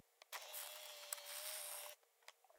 sl50 zoom out
Samsung SL50 zooming out (motor noise)